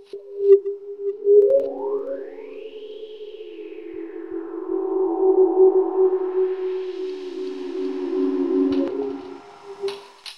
this is a soundscape i made in Audiomulch to simulate an alien planet's landscape scene